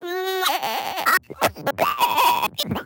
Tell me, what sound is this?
Samples from a FreakenFurby, a circuit-bent Furby toy by Dave Barnes. They were downsampled to 16-bit, broken into individual cues, edited and processed and filtered to remove offset correction issues and other unpleasant artifacts.